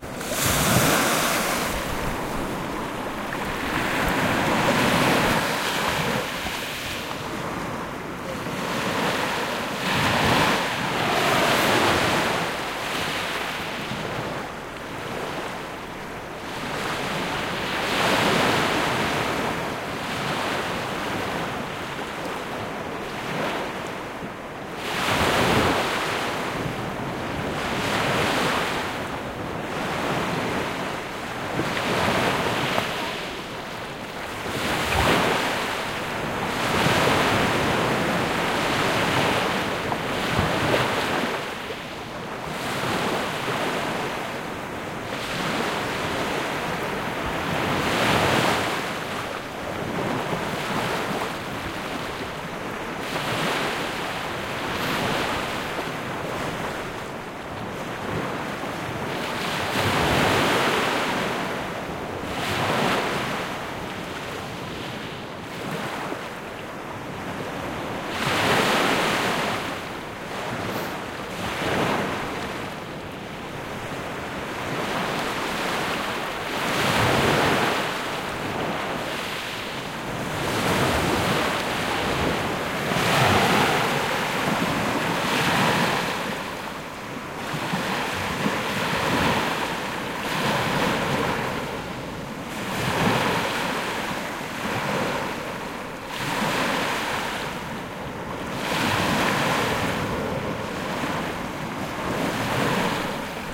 Sea Waves Beach 01
Sea waves lapping on to sand & pebble beach. Recorded 1st September 2017 on the North Landing Beach, Flamborough, UK. Exact same location that was used in the final scene of the movie 'Dads Army'.
Recorded using a Sanyo XPS01m
Beach, crashing, lapping, Ocean, Pebbles, Sand, Sea, Water, Waves